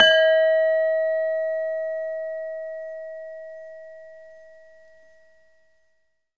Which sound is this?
Sample of a saron key from an iron gamelan. Basic mic, some compression. The note is pelog 2, approximately an 'Eb'